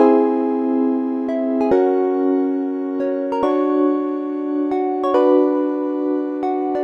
5 - jamiroquai fait du rock 2
Second version of my chiptune jamiroquai pathetic tribute (don't know if it's really a tribute, but I was thinking of their first album when I made that little shabby loop). All melodies are made with Synth1 vst.